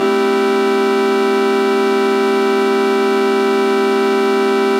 FL studio 12
VSTI: 3x Osc
Tone: D5+A5
Tempo: 100
Stereo Shaper: mid-a side splitter
FL Delay Bank: 6 voice choir
EQUO: vowels
field-3xOsc, field-FL, field-recording, Studio